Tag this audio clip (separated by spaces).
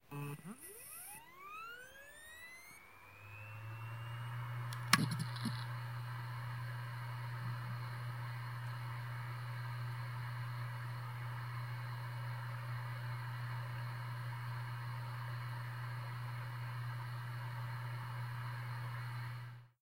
Retrocomputing SCSI2 SCSI PC Computers Datacenter Workstation